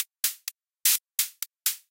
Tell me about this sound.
SPS 1 HH Copate
High Hat loop from SPS 1 Machinedrum
drum, hardware, loop, machinedrum